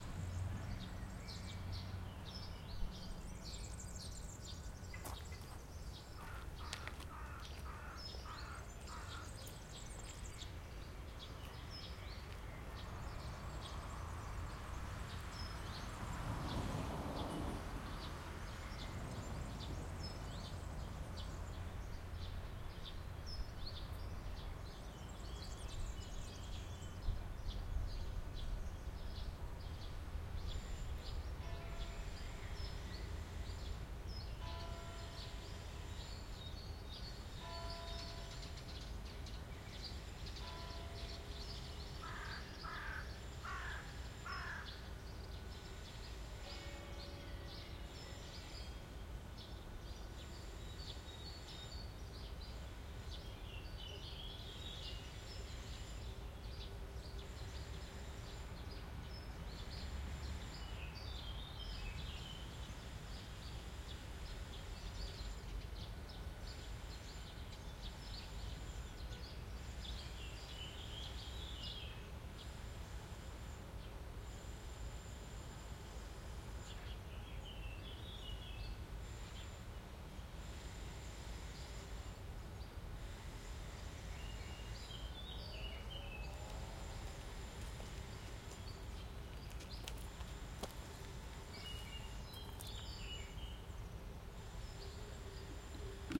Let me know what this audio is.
Unprocessed recording of park/forest ambience in a small town. Church bells in distance.